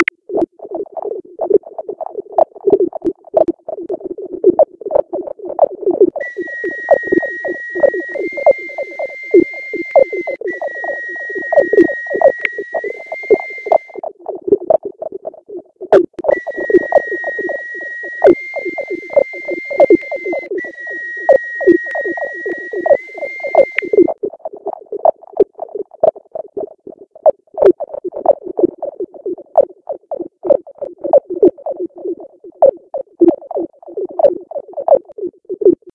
NAVY WHISTLE HEAVY SONAR
This is our rendition of heavy sonar looking for that enemy ship in the ocean. We thew in a bowswain's whistle just because that sounds like navy jargon in the mix. The pings are reworked bat chatter.